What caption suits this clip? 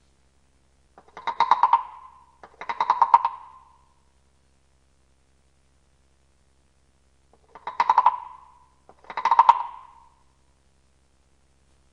wooden frog e
This is the sound of a wooden frog -the kind with ridges on their backs and a small stick which is scraped across the ridges to imitate the call of a frog. This sample has been stretched to double length which I think has enhanced the reverb.
atmosphere, frog, percussion, sound-effect, wood